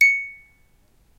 one-shot music box tone, recorded by ZOOM H2, separated and normalized